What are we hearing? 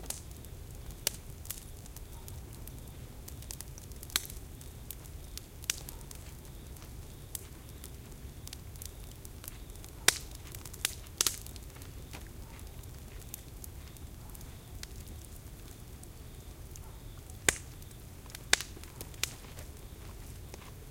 This is just a 20 second long clip without anyone talking and movement of the people is minimal. Just a nice bonfire sample.